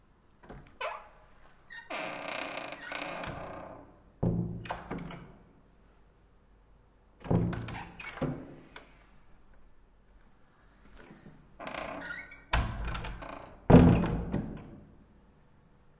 door wood heavy hotel open close creak echo handle deadbolt Gaza 2016
creak, wood, open, deadbolt, door